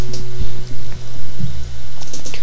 electric noise 2
Electricity resembling sound.
sparking, sparks, sparked-up, spark, electra, electric, electricity, sparked